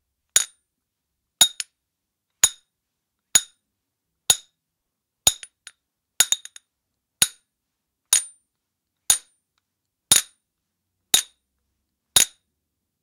metal hammer clink
Metal hammer striking metal bar making a harsh clinking sound
clink, metallic